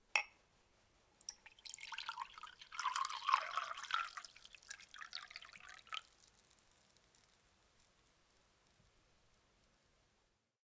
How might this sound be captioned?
pouring water in glass1
Water or some other liquid, being poured into a glass (variant one, with glass rattle). Recorded with Oktava-102 & Behringer UB 1202.
drinks, glass, kitchen, pouring, rattle, water